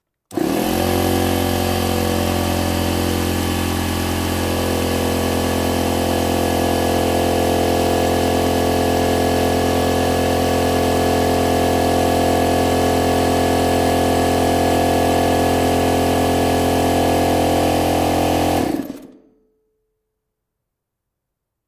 electric air compressor close mono
An electric air compressor running. Recorded with Sennheiser mkh 60 connected to an Zoom H6. Close-miked for less reverb.
air-compressor, industrial